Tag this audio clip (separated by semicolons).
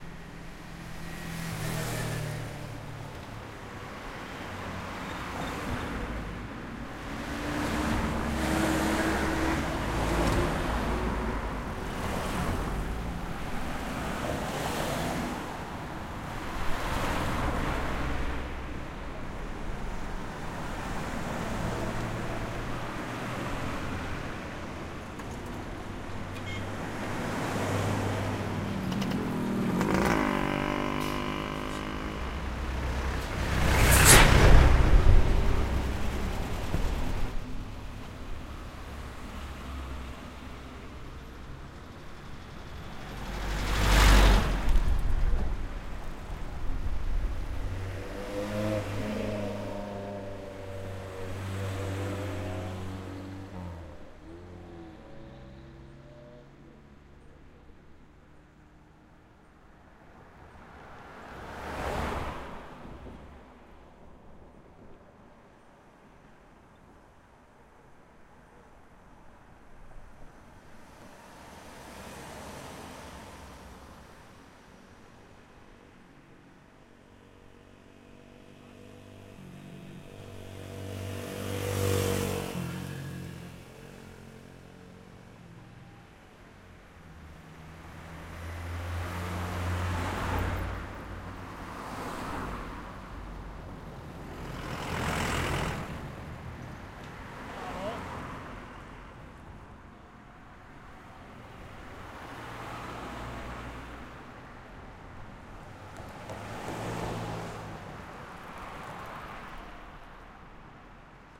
road; traffic; street; cars